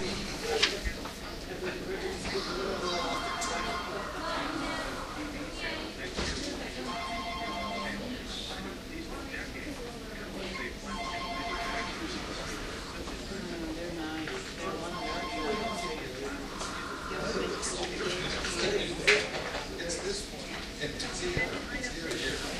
ER corner2
Sitting in a corner at the hospital emergency room recorded with DS-40.
emergency, room